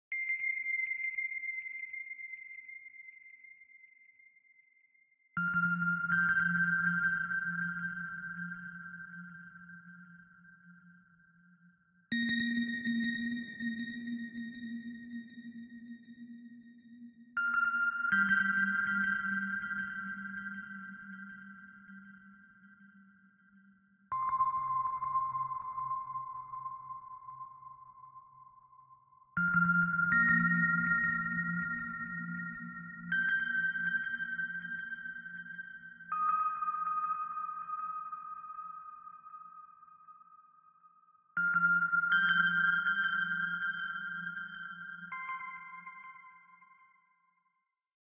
Clockwork Bells
16 bar bell melody at 80bpm in the Key of C.